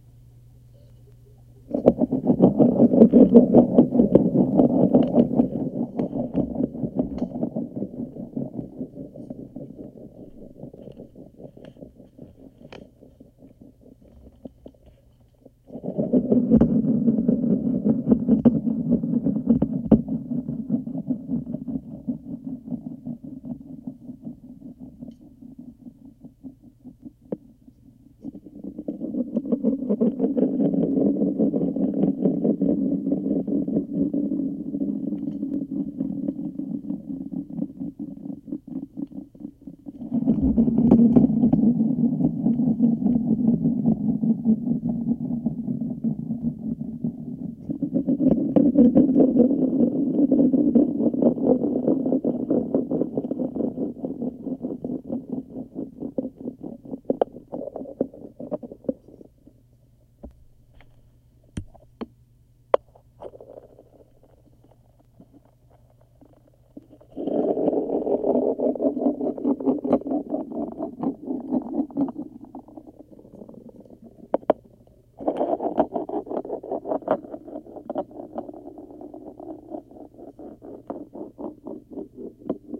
Kind of hard to explain what this is, but my kids have these lopsided plastic balls and this is what they sound like rolling on the wood floor with a contact mic on the floor